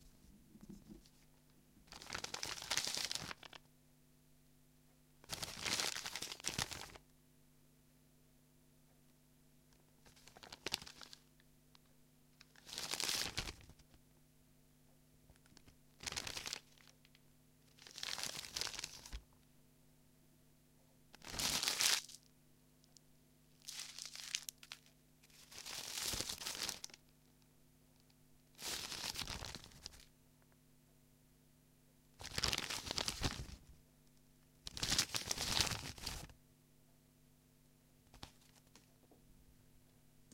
Unfolding and crumbling paper
I unfold a piece of paper several times to get different effects.
The idea I had here was someone recieving a letter and quickly open it curious to read what it says. or perhaps a treasure map of some sort :)
folding, crumble, wrapping